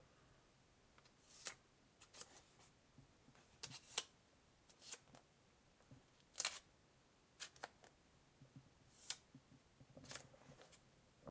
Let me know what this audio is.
13 Sticky notes
Sticking notes on the paper.
Office, Czech, Panska, CZ, notes, Sticking